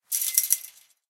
rattling chainlink fence. low cut noise.